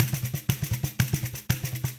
Pandeiro de couro (leather tambourine)
Brazilian samba with leather tambourine.